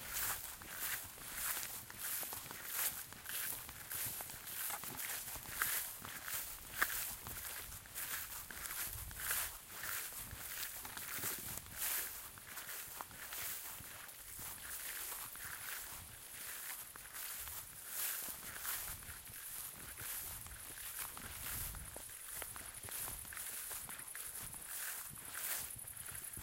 Footsteps on sand and gravel
gravel, walk, steps, sand, footsteps, walking